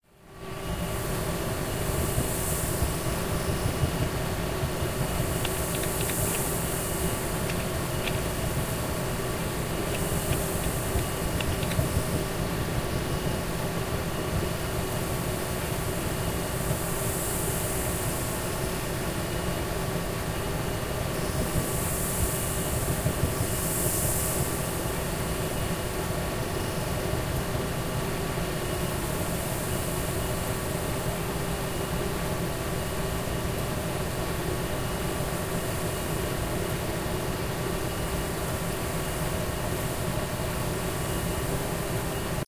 Imagining what it would sound like inside a manned habitat on Mars during a global dust storm. Mars' thin atmosphere transmits the highest frequencies of the wind and blowing dust best, leaving a thin sound of fine and coarse particles driving against the hab against the background of ventilation systems, an astronaut typing, and a loose solar panel flapping against the skin of the hab. Used the following sounds, some altered with high and low-pass filters, ring modulation/mechanize in Goldwave.
sand against hydrophones...
Keyboard typing
Phantom Quadcopter steady flight